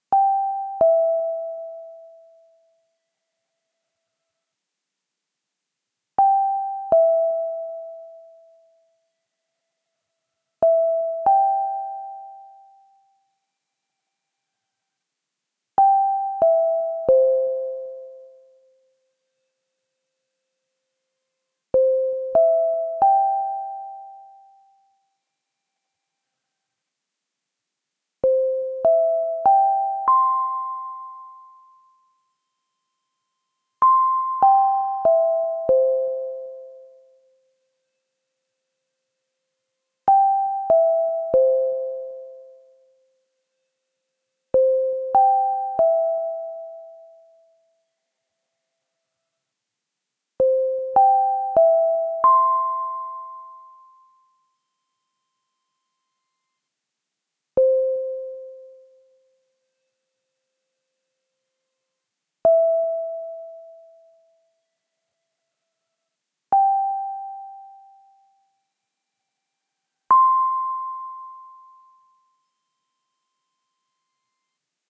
14 various synthesized bell tones, originally created as chimes to precede paging announcements. Made with a modular synthesizer
tone, paging